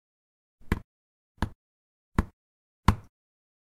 This is the sound a pumpkin makes when you whack it good. Why not record everything?